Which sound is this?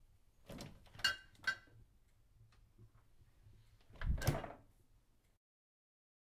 KitchenEquipment FridgeDoorOpenandClose Mono 16bit
Opening and closing fridge door
16, bit